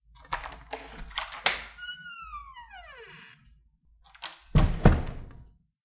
squeaky door hinge open and close
This is from my front door before applying lubricant to get rid of the creak/squeak. I used my Zoom H2n and then removed the ambient noises with Adobe Audition.
creak, creaking, creaky, creaky-door, door, hinge, hinges, squeak, squeaking, squeaky, squeaky-door, wooden-door